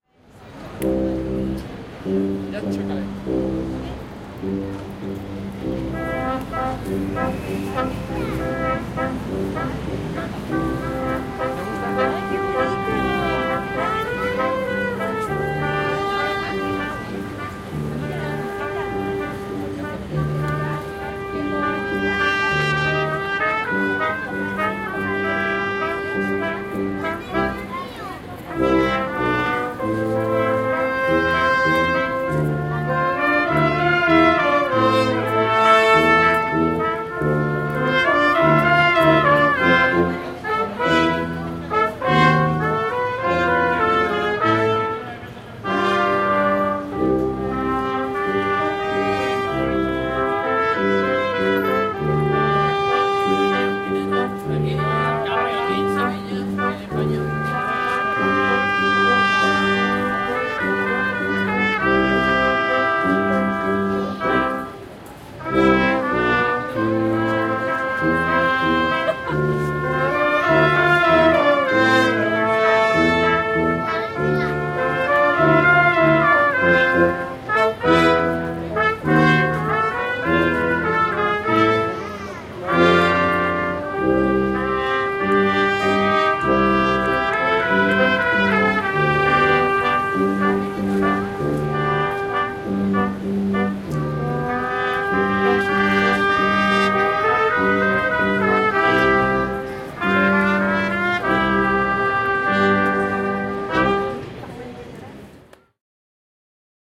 A recording of at street brass band playing at the Christmas Fair in Plaza de la Encarncion in Sevilla Spain. Recorded with a Zoom H2n in 2 channel surround mode.